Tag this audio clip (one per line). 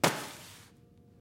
soundeffect
crash
foley
thud
sandbag